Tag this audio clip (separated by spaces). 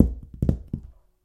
0 boxes egoless natural sounds stomping vol